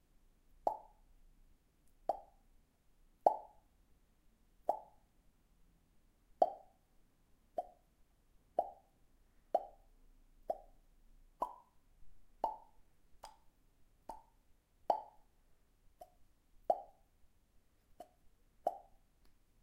sound of a cork bottle popping. thumb in mouth :)